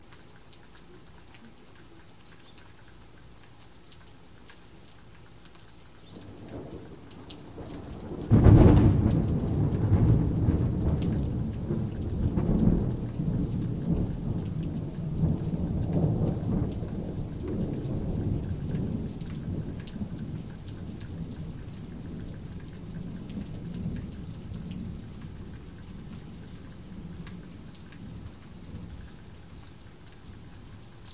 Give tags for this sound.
thunder-storm
storm
rain-storm
thunder
rain

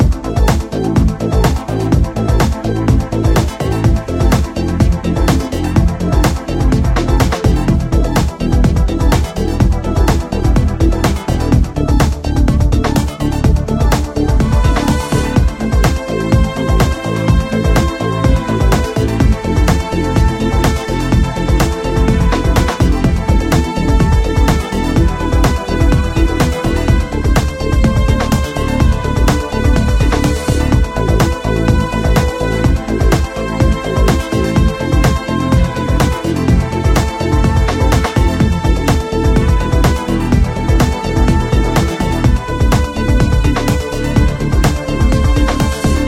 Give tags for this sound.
game
gamedev
gamedeveloping
games
gaming
indiedev
indiegamedev
loop
music
music-loop
Philosophical
Puzzle
sfx
Thoughtful
video-game
videogame
videogames

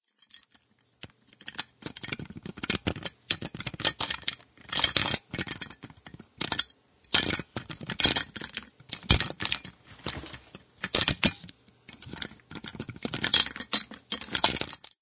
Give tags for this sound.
Clase
Tarea

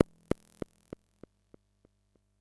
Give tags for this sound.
100bpm
electronic
multi-sample
synth
waldorf

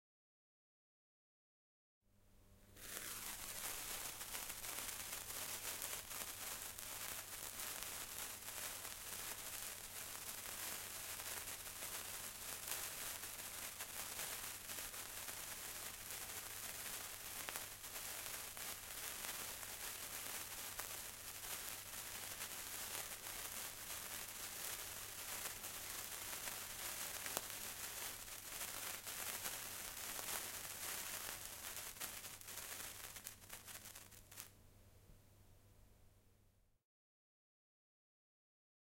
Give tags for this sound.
CZECH
CZ
Panska
christmas
sparkler
fire

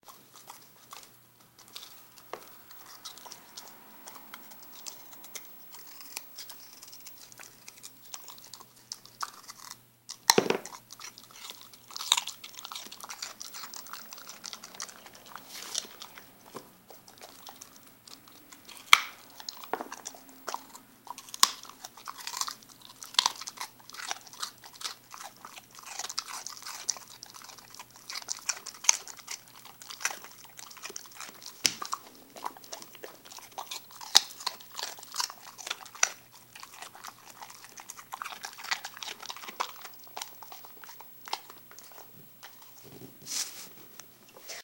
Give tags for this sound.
Animal
Carrot
Crunching
Dog
Eating
Pet

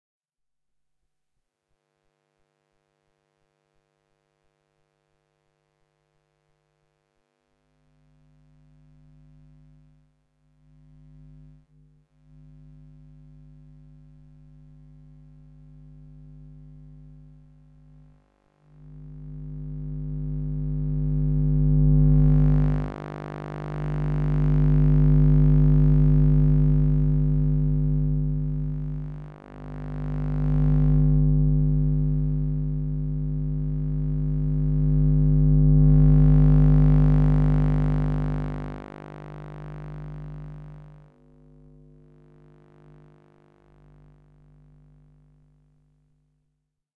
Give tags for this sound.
electronic
experimental
sound-enigma
sound-trip

